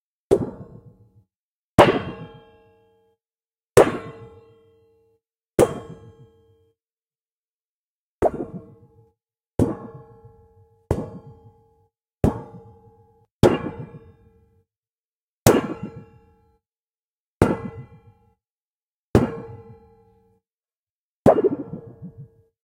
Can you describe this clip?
sheet metal sound four: Bang
Sounds like a cartoon getting hit on the head with a frying pan to me. But it can be whatever you want it to be! Would love to know what people use it for :)
sheet, cartoon, ridicule, kapow, boom, sound-effect, pan, fun, wonky, funny, hit, womp, boing, funky, metal, pow, comedy, sound-design, sheet-metal, punch, metal-pan, frying-pan, metallic, bam